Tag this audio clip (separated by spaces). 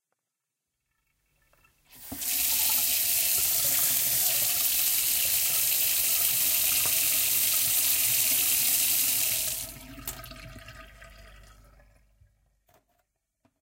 Dishes running sink tap tap-running Washing-up